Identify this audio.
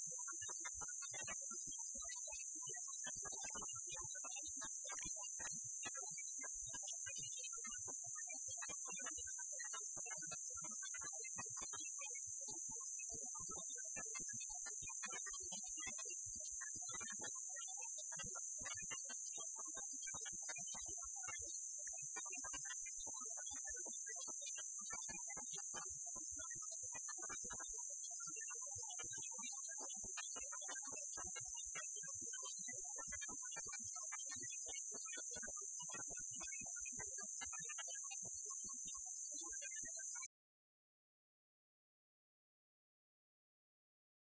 recording of an empty radio frequency